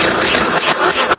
Save diesel engine start, generate reverse effect and change frequency to 66 and depth to 70.
engine, spacecraft, start